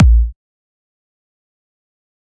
Free Kick drum made with drum synth